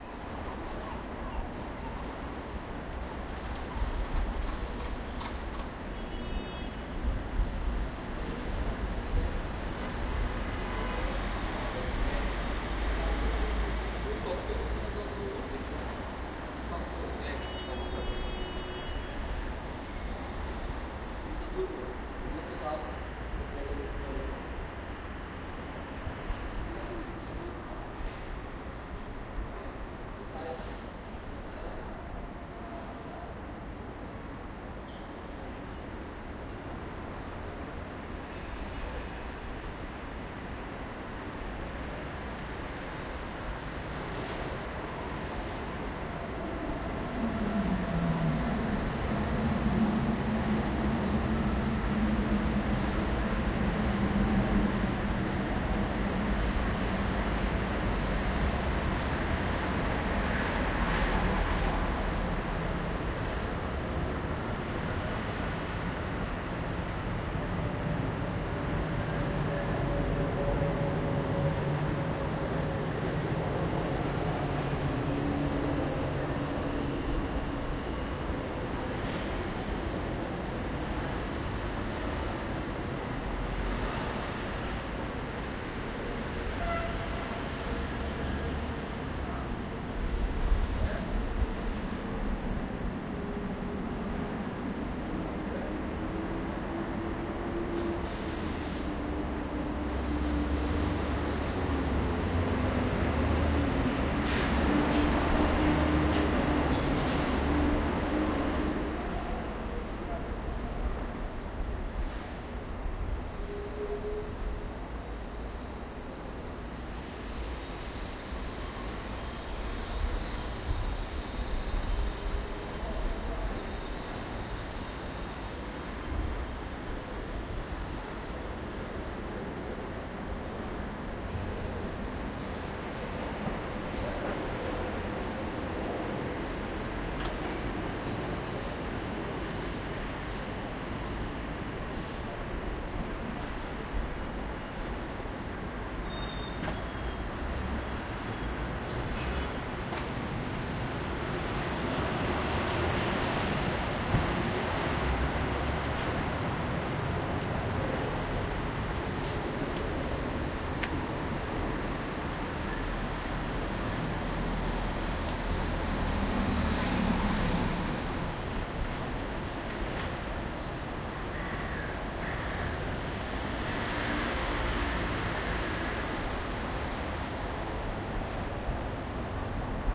Distant traffic in the morning, Rome
Distant traffic and some voices in a small street in Rome, early morning. Recorded with a Zoom H1.
field-recording, traffic, city, noise, street